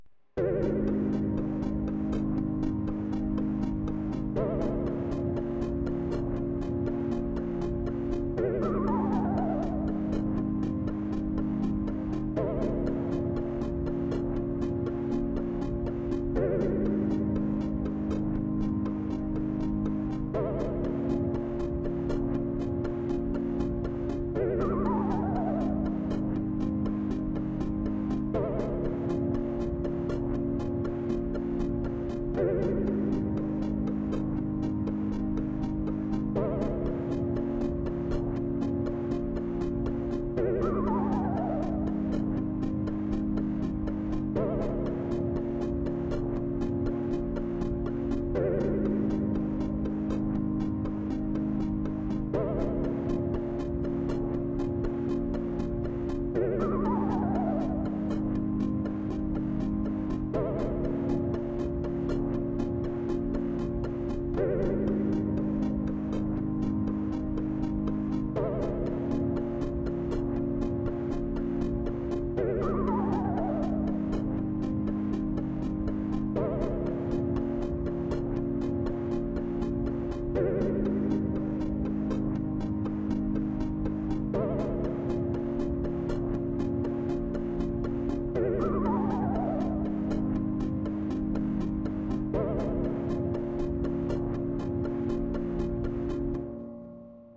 squeaky clean retro beat
layered retro synth beat
beat, clean, retro